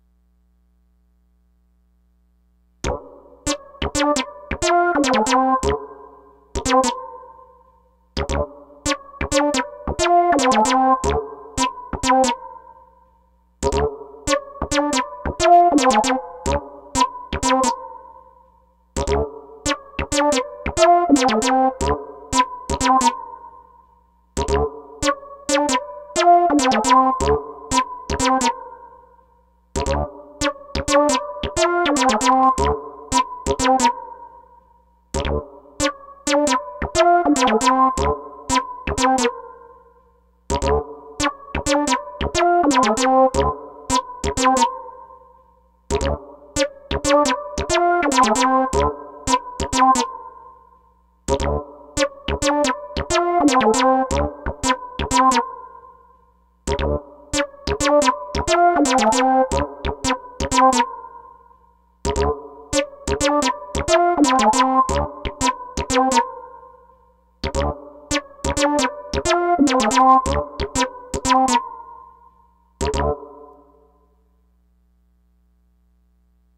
Funky loop bit